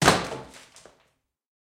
Recording of a variety of pieces of wood falling onto other pieces of wood. Was originally recorded for smashing sound effects for a radio theater play. Cannot remember the mic used, perhaps SM-58, or a small diaphragm condenser; but it probably went through a Sytek pre into a Gadget Labs Wav824 interface.
wood, clean